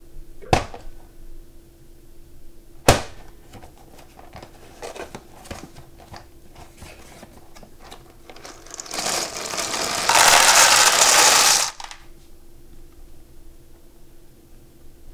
Pouring cereal from a box into a bowl.